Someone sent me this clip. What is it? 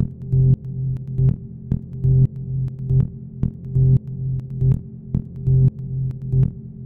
Horror Loop
tension, Suspenseful, tense, dark, Thriller, film, suspense, horror